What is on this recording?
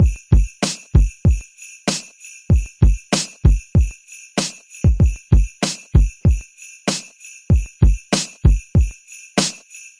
breakbeat hiphop A3 4bar 96bpm
Simple beat recorded from Octatrack, processed with Analog Heat. Four bars, 96 BPM. 3/6
breakbeat, hiphop, loop, sleigh